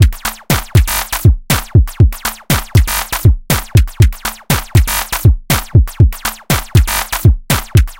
Aerobic Loop -03
A four bar four on the floor electronic drumloop at 120 BPM created with the Aerobic ensemble within Reaktor 5 from Native Instruments. Very danceable, very electro. Another variation of 'aerobic loop -01'. Normalised and mastered using several plugins within Cubase SX.
120bpm, drumloop, electronic, loop, rhythmic